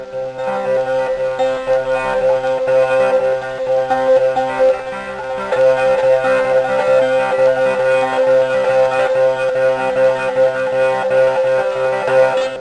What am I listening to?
Me playing on tanpura (an Indian instrument), sometimes also called a tambura. It's tuned to C (either major or minor as it's first and fifth).
indian, tanpura, eastern, atmosphere, c, tambura, raga